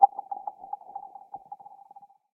this sample is part of the icefield-library. i used a pair of soundman okm2 mics as contact microphones which i fixed to the surface of a frozen lake, then recorded the sounds made by throwing or skimming several stones and pebbles across the ice. wonderful effects can also be achieved by filtering or timestretching the files.
chill, crack, winter, ice, impact, lake, cold, field-recording